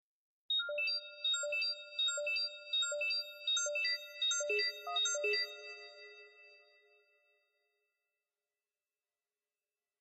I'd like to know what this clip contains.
beeps sounds like a count down. recorded and edited with logic synth plug ins.
beeps, computer, counting